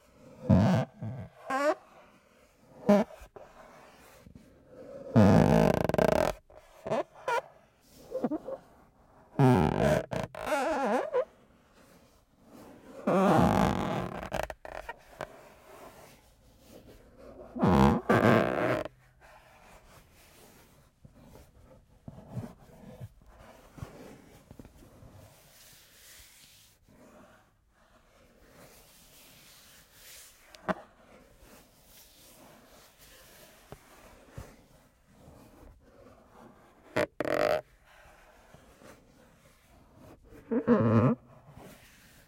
texture plastic
sound texture- plastic. created by slowly pressing & moving my fingers on an old Nagra III case.
MJ KM-319-> TC SK48.
movement, plastic, slow-movement, texture